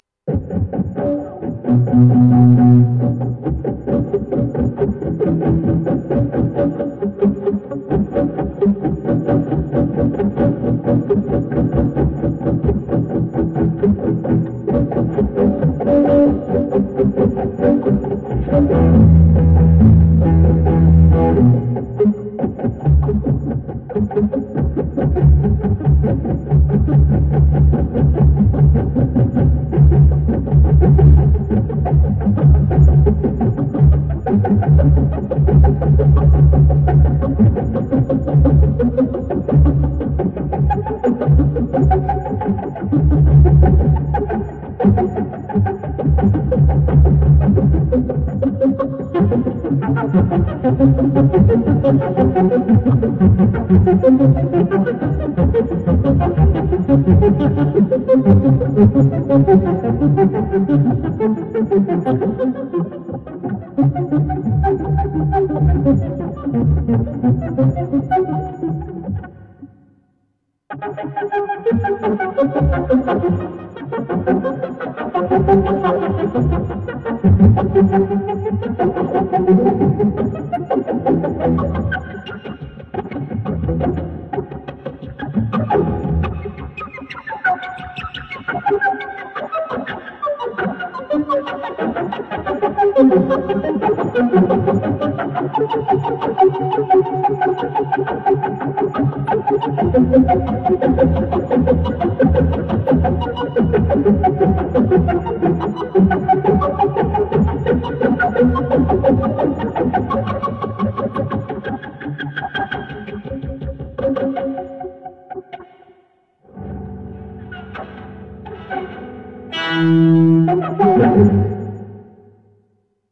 postimpress6stringtap
Post impressionistic tapping of muted strings on electric guitar for that "night gallery" or "twilight zone" vibe.